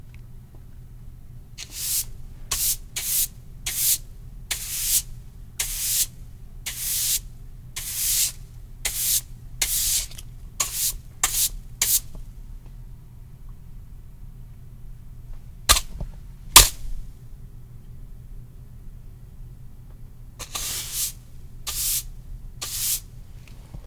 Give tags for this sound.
sweeping broom tile floor

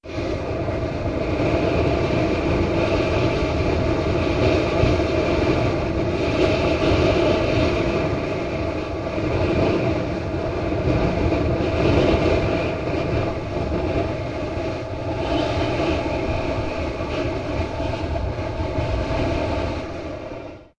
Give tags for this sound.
windy chimney whistling strong-wind galeforce gale wind

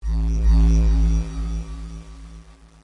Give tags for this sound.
ambience one-shot

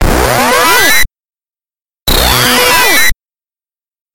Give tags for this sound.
bytebeat cell-phone cellphone phone ring-tone ringtone sonnerie

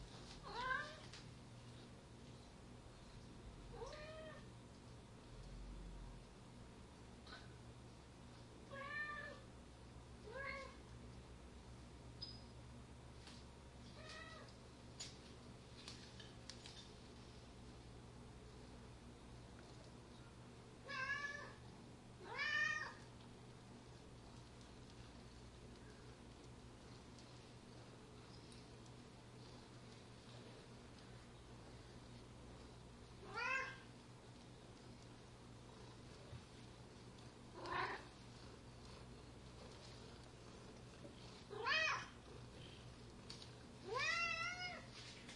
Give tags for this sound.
ambiance cat meow

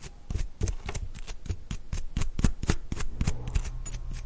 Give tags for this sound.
bassoon bassoon-reed beat brush brushing grind grinding percussion profiler pull pulling push pushing reed reed-making scrape scraped scraping tip tip-profiler toothbrush

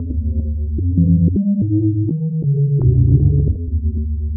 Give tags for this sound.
horrorcore horror noise

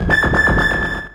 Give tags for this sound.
synth one-shot multisample

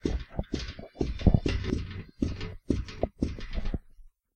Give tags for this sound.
Germany Essen

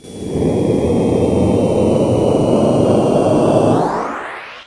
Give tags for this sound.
goa psytrance

